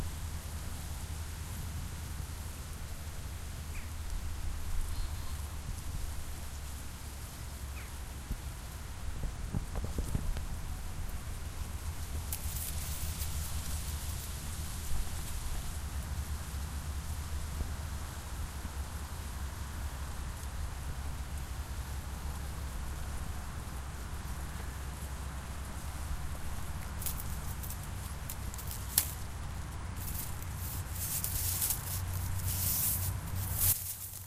recording of swamp space during the night
night, silence